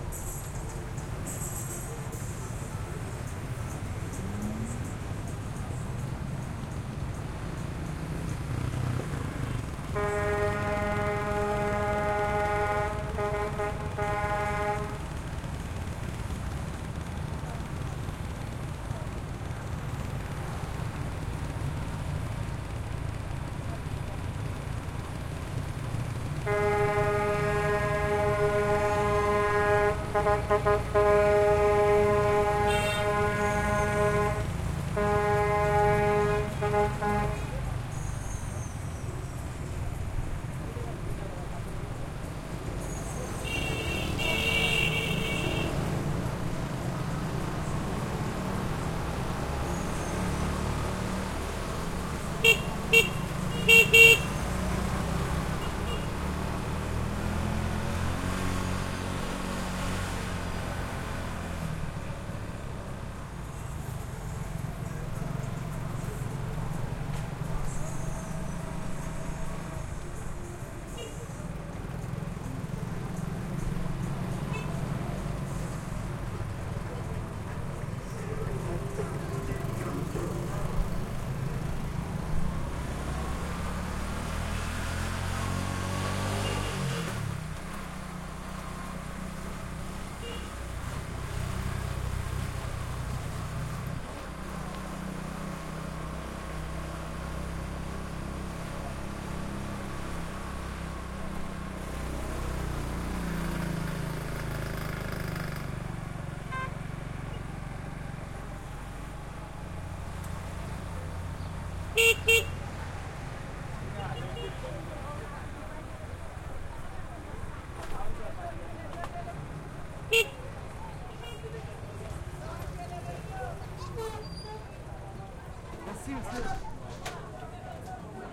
traffic medium Haiti horn honks
traffic, Haiti, medium, horn, honks